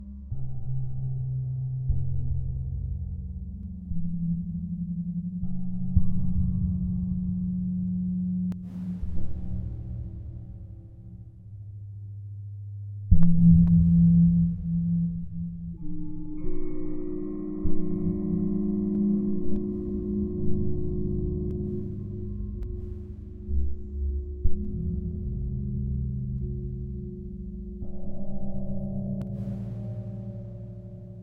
2023-01-07-dark-ambient-horror-ambience-002
creepy spooky haunted dark deep void black darkness
darkness, creepy, dark, black, spooky, void, haunted, deep